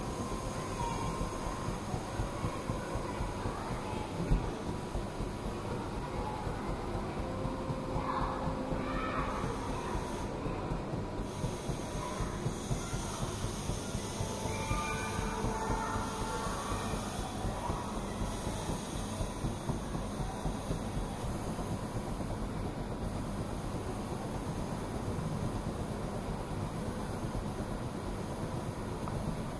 cosmocaixa sand exhibit. edirol R-1 built-in stereo mics